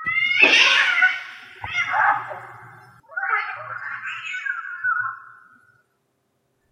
These cats were going nuts in the alley outside my window. The echoy, warberly sound comes from running a noise reduction function, as there was a lot of hiss in the unprocesses file.
catfight, cats, crazy, feral, meow, rabid